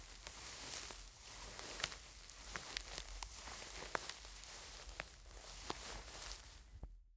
The sound of me walking on leaves in the forest. It's a pleasant feeling, even though the leaves had fallen too early due to the heat this summer.